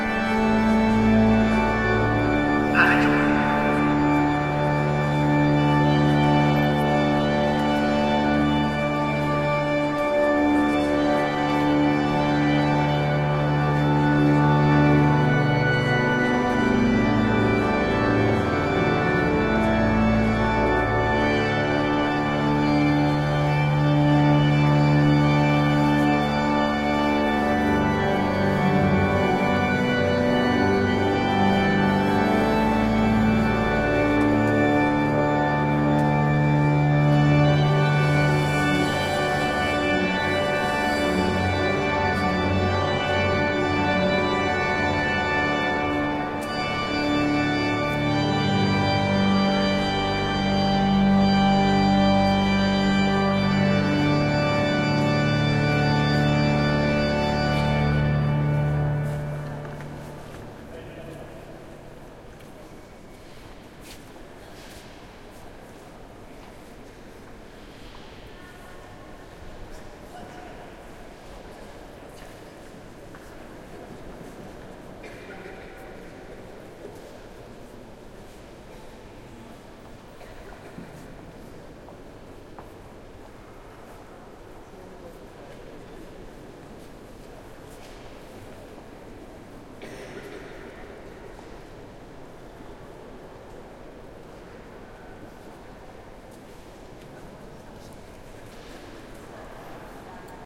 cathedral barcelona
This recording is done with the roalnd R-26 on a trip to barcelona chirstmas 2013.
background-sound, cathedral, chuch, soundscape